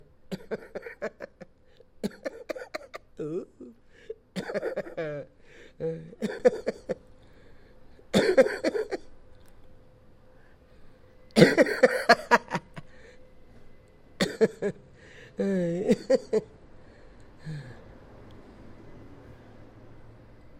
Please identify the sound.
evil laughter coughing joker
coughing, evil, joker, laughter